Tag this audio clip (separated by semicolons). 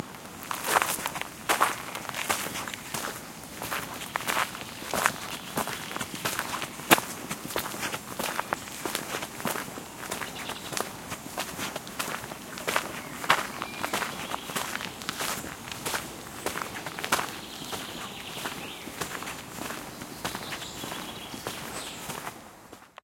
birds; walking; forrest; path